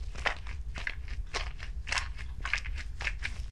slime noise 10 1
Slime noises done by J. Tapia E. Cortes